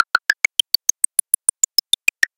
sonokids-omni 16
abstract, analog, analogue, beep, bleep, cartoon, comedy, electro, electronic, filter, fun, funny, fx, game, happy-new-ears, lol, percussive, ridicule, sonokids-omni, sound-effect, soundesign, synth, synthesizer, toy